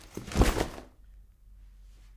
bag down 6
Can be used as a body hit possibly.
bag, body-hit, impact, rucksack